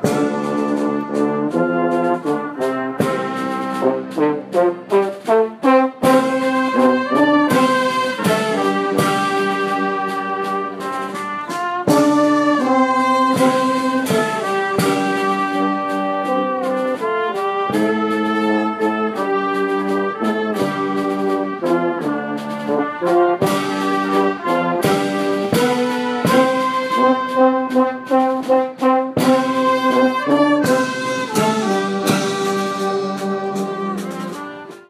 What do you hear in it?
Chinatown Funeral
The sounds of a funeral march outside a park in Chinatown NYC.